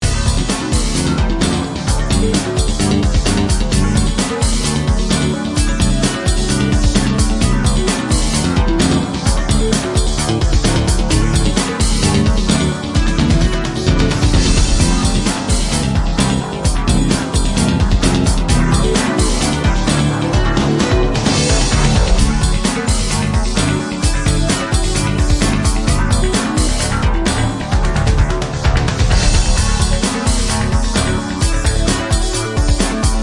A short but satisfying loop cut from one of my original compositions. 130 BPM Key of A suspended 3rd.
130, Loop, Beat